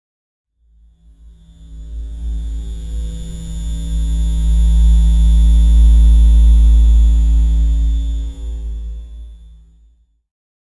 Pad sound, low pitched hum/buzz, almost like a ground loop.
ambient, dark, dirge, edison, fl, flstudio, pad, soundscape